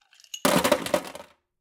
Ice Cubes Glass Drop Sink 02
Ice cubes being dropped from a glass into a kitchen sink